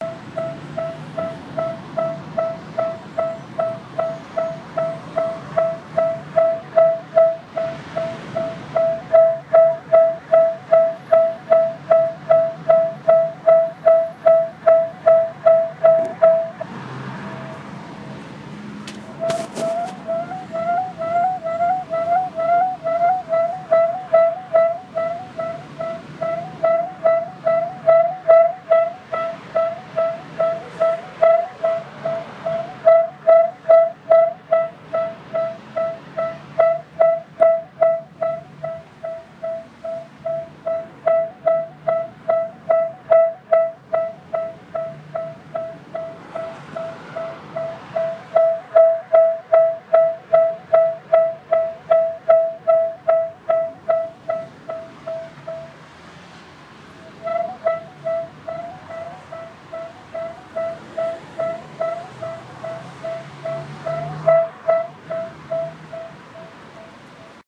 Door System Speaker makes feedback sounds
Was walking around and i heard this strange sound so i recorded it with my i phone